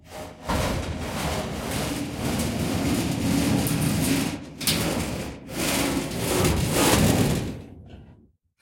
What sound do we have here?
long-metal-scrape-04
Metal hits, rumbles, scrapes. Original sound was a shed door. Cut up and edited sound 264889 by EpicWizard.